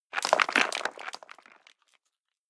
S O 1 Rocks Falling 03
Sound of small rocks hitting the ground. This is a mono one-shot.
Drop, Falling, Foley, Impact, Rocks, Stones